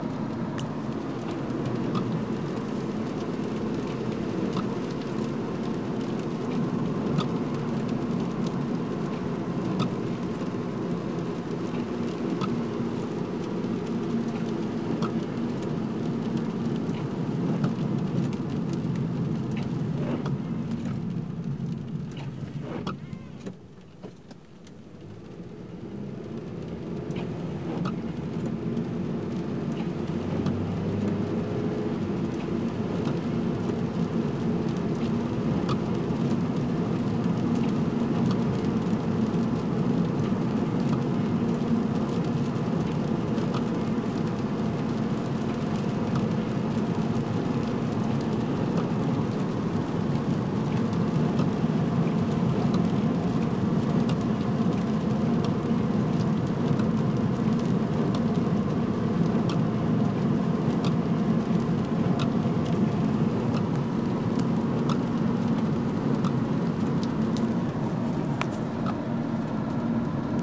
driving with wipers
Driving in the rain at night with my windshield wipers on.